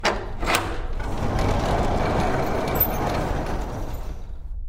This is a recording of a horse stall door opening.